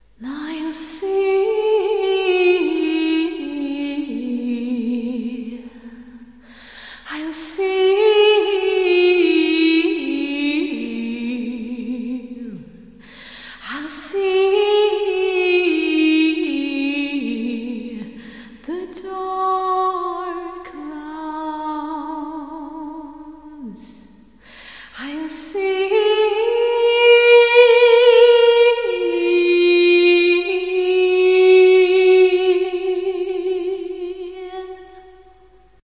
Female vocal (test)
Short recording of me, singing a line while I test out Ardour and play around with the (terrible) built-in effects on my Edirol UA4FX.
Mic used: C3 Behringer
Program: Ardour (Linux)
Interface: Edirol UA4FX
reverb-madness, whimsical